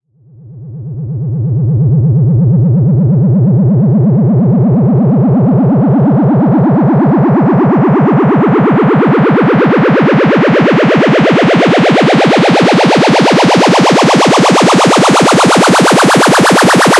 Wobbly Pitch Modulation Riser
This is a 127 BPM, 9 bars, C-scaled uplifting effect I created in Cubase with Synth1. I didn't tweaked it too much so you can work on it to fit it in your mix.
Have fun!
127, BPM, Digital, Effect, Synth, Synthesizer, Transition, Uplift